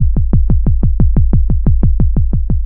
90 bpm ATTACK LOOP 3 kick element 3 mastered 16 bit
This is a component of a melodic drumloop created with the Waldorf Attack VSTi within Cubase SX. I used the Analog kit 1 preset to create this loop. Tempo is 90 BPM. Length is 1 measure. Mastering was done within Wavelab using TC and Elemental Audio plugins.
90bpm, electronic, kick, loop